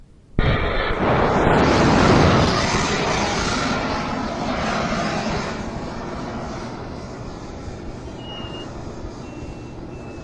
A mouth noise mixed with FM radio static played by stereo in background, recorded into portable cassette deck with Fast Forward activated during recording to slow everything down on playback.
cassette, noisy